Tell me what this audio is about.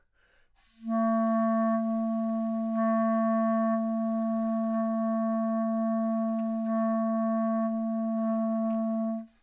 Part of the Good-sounds dataset of monophonic instrumental sounds.
instrument::clarinet
note::A
octave::3
midi note::45
good-sounds-id::984
Intentionally played as an example of bad-timbre